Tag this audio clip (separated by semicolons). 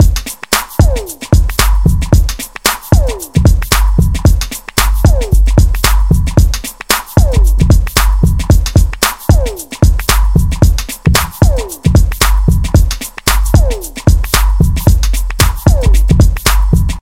beat; bpm; drums; electronic; rhythm